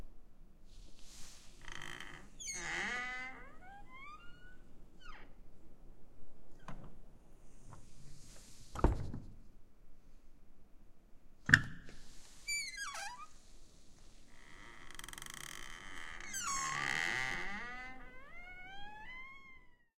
Door Close Heavy Metal Glass Slow Creak Seal Theatre
Sound of a heavy glass and metal door slowly closing with very detailed creaks.